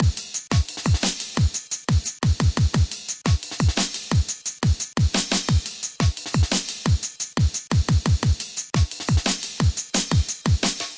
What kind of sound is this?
dnb beat 2 Slow 175
Typical drum'n'bass loop with punchy kick & snare with amen break in the back.
2step, bass, beat, break, dance, dnb, drum, jungle, loop, processed